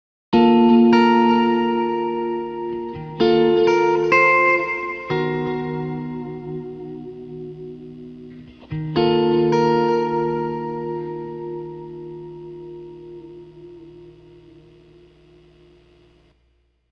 chordal meandering 5
ambient, guitar